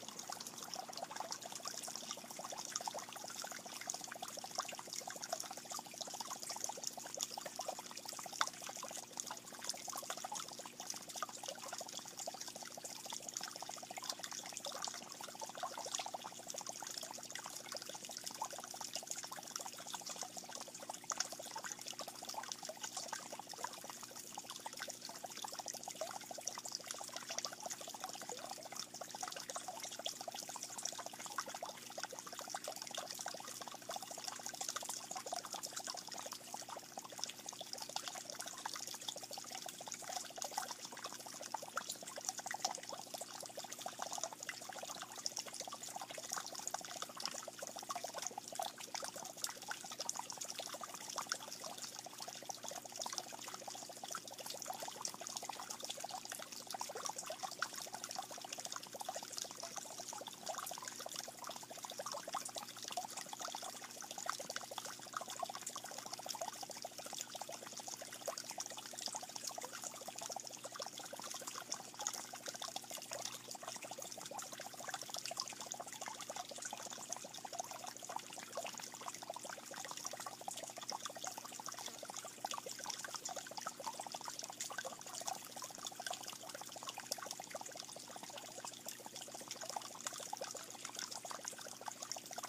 Pond At Kayes
Water falling into a lily pond. Water is falling/gurgling continually. Field recording. iPhone 5c.